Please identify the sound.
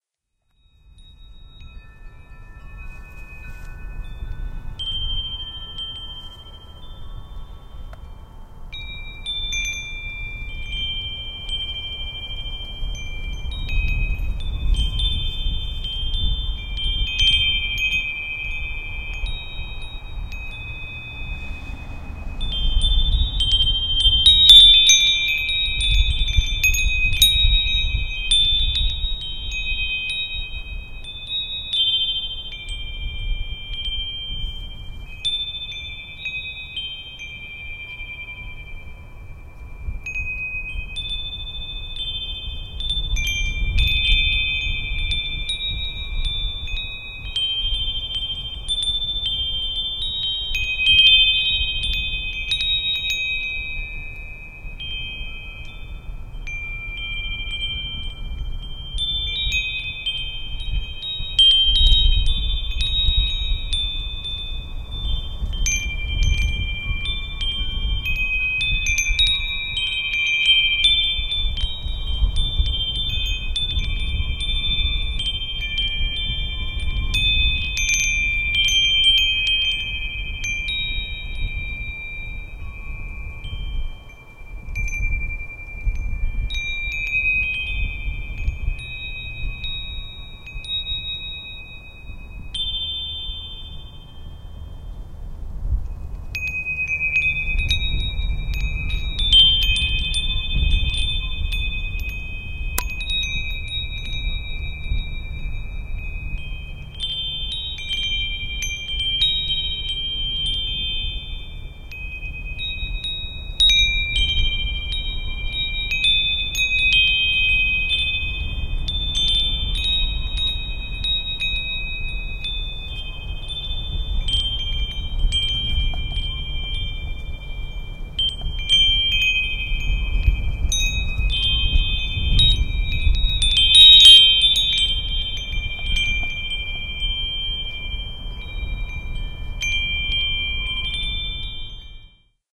Wind Chimes 2 1 17 Lincoln Ne 25 degrees
The sounds of my lesser wind chimes as a northern front blew through on February, 2, 2017. Temperature 20-degrees Fahrenheit.
chimes, Lincoln-Nebraska, Nebraska, tubular-bells, wind, wind-chimes